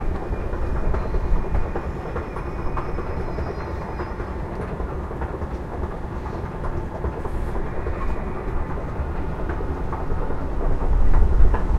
Field-recording of escalator at a Dutch trainstation.
Recorded going up the escalator from start to end.
escalator, field-recording, fieldrecording